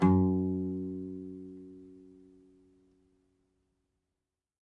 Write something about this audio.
F#2 played on an acoustic guitar with a mediator for the right hand and no left hand technique.
Recorded with a Zoom h2n